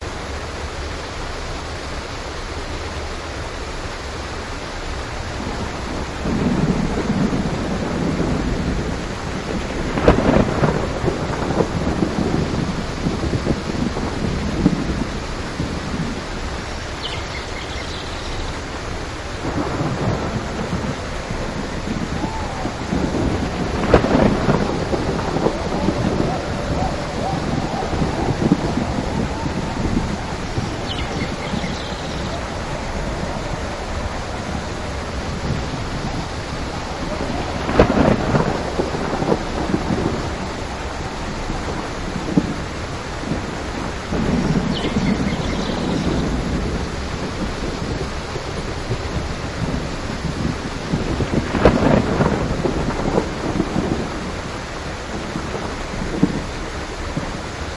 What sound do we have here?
Chuva e natureza
Som da chuva na floresta, trovões e pássaros cantando.
birds, forest, nature